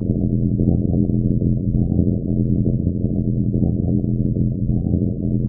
Rolling Ball Loop
I isolated the sound of a bowling ball rolling so that it makes a continuous loop with consistent pitch and volume.